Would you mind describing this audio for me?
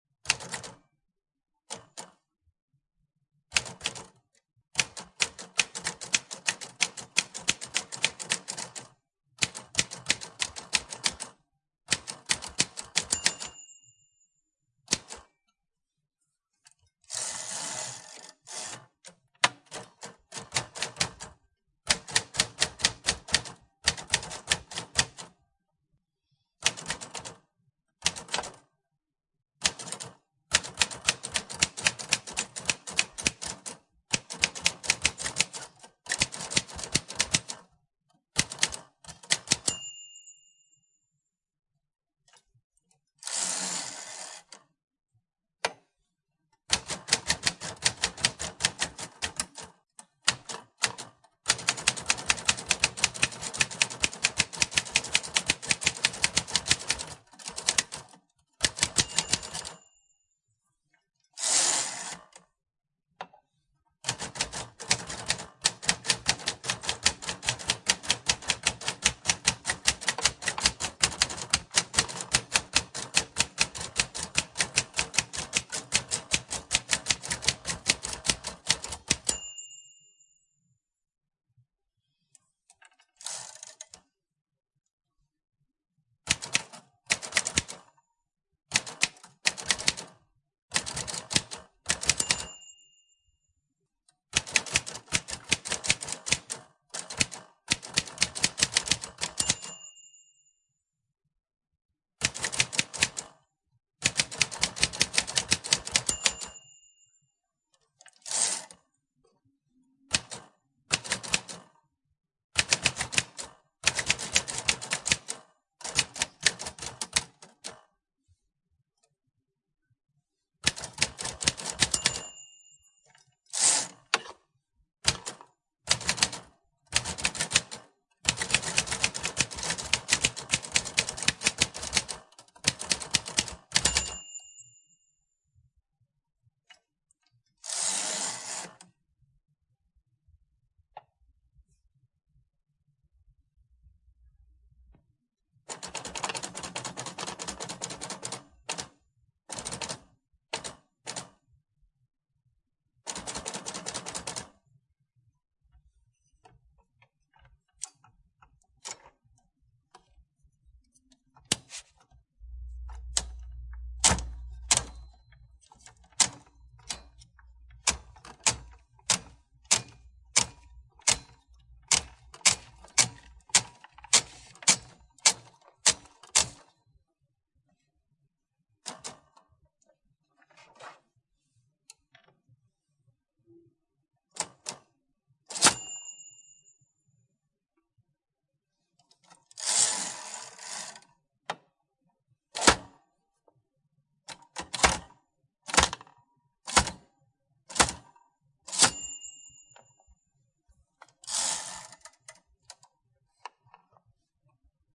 Typing and typewriter related sounds from a vintage 1960s Smith-Corona Corsair Deluxe typewriter. Typing at different speeds, return "bell", page crank, space bar, tab etc.